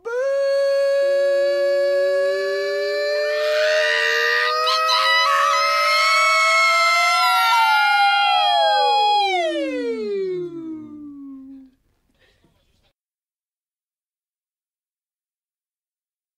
screaming voices weirdI
Three voices (2 males and 1 female) screaming weird.
Veus, Surrealistes, Voices, Surrealist, Strange, Screaming, Estranyes, Gritos, Voces, Cridant, o